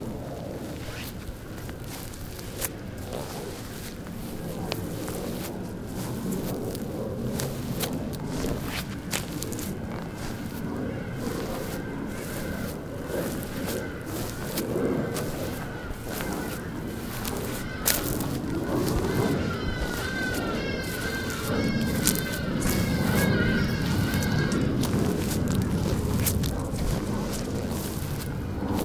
Walking on grass
OWI, barefoot, feet, footsteps, grass, steps, walk, walking